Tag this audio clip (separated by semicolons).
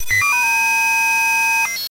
digital; Beeping; TLR; computer; Beep; electronic; NoizDumpster; TheLowerRhythm; technology